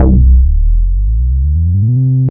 basscapes Animalog
a small collection of short basscapes, loopable bass-drones, sub oneshots, deep atmospheres.. suitable in audio/visual compositions in search of deepness
ambience, ambient, analog, atmosphere, bass, boom, creepy, dark, deep, drone, electro, experiment, film, horror, low, pad, rumble, score, soob, sub, suspence